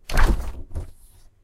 A refrigerator door opening from the exterior.
Recorded with a Zoom H1 Handy Recorder.